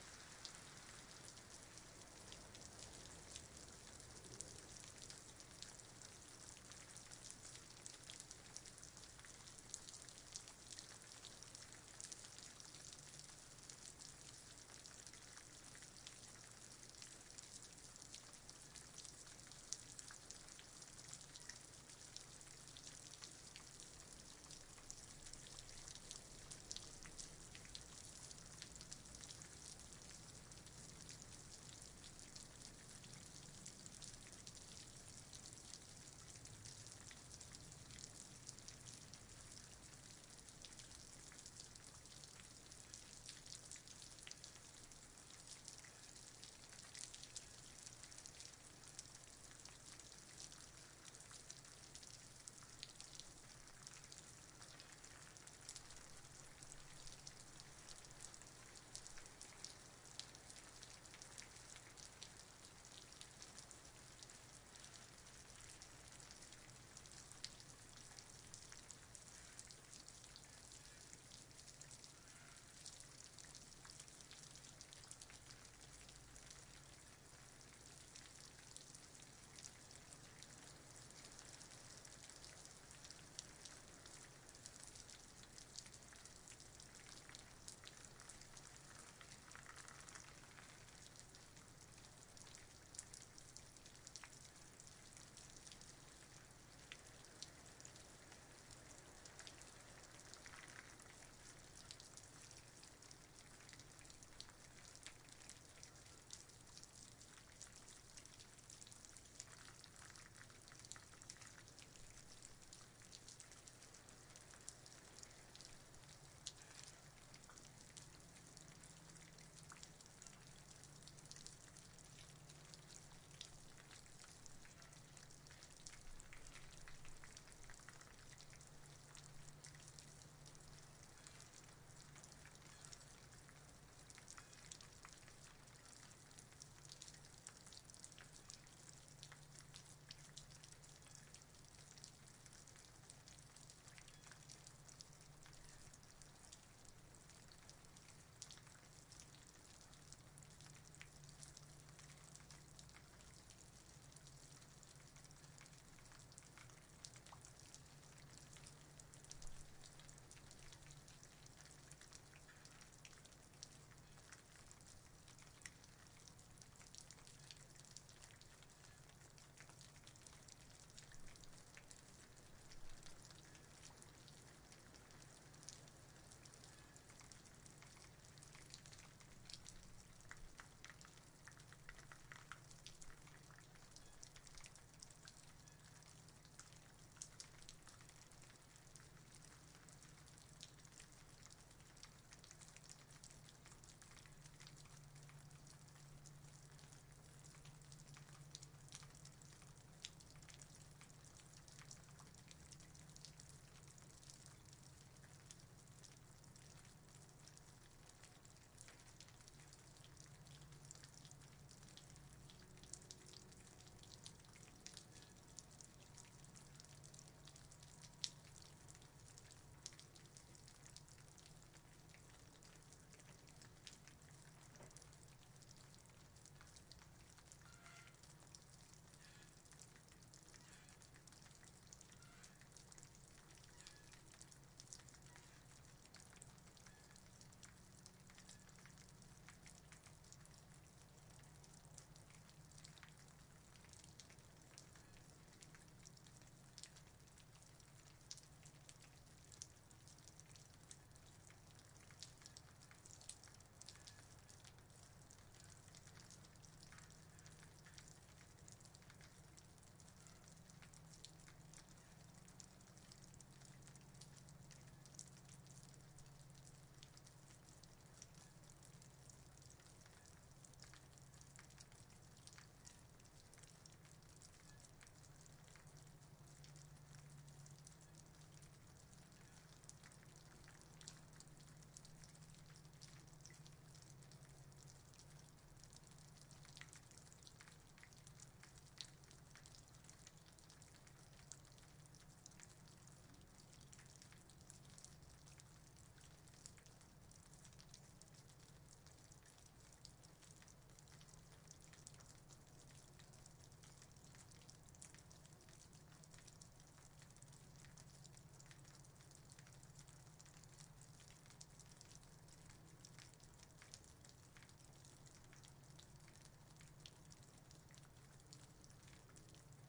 Medium Light Rain
Some medium to light rain occurring outside of my apartment window.
Storm, Ambience, Dripping, Light, Rain